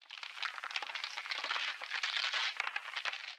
Freezing HZA
I needed the sound of something rapidly freezing (a la "Frozen"), so I mangled a field recording to that effect. Add some reverb for more chills!
chill, freeze, freezing, frost, frozen, ice, timelapse